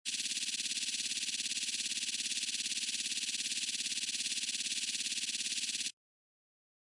Note: the pre-listening mode can introduce significant distortion and loss of high frequencies into the original phonogram, depending on the density of its frequency spectrum. Sound effects for dubbing screen printing. Use anywhere in videos, films, games. Created in various ways.
The key point in any effect from this series was the arpeggiator. Enjoy it. If it does not bother you,
share links to your work where this sound was used.